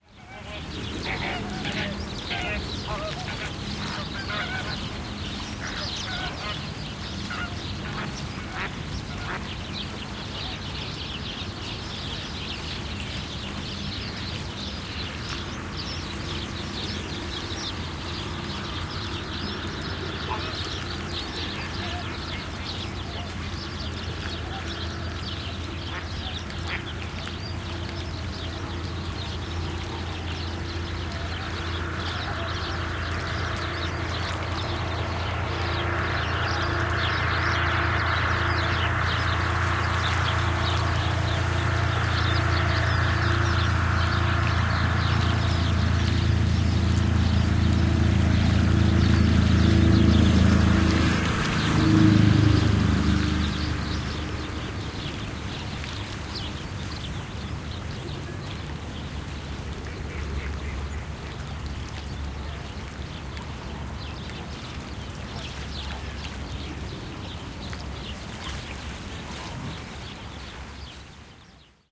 20180221 engine.along.marsh
Roaring vehicle approaches to a pond, Greater Flamingo callings in background. Sennheiser MKH 60 + MKH 30 into Shure FP24 preamp, Tascam DR-60D MkII recorder. Decoded to mid-side stereo with free Voxengo VST plugin
engine, field-recording, machine, nature, north-by-northwest